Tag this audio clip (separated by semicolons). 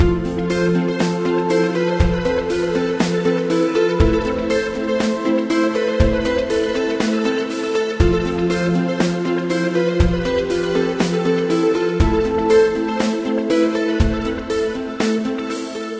gaming; videogame; video-game; Thoughtful; Philosophical; gamedeveloping; indiegamedev; gamedev; videogames; music; music-loop; games; sfx; Puzzle; loop; indiedev; game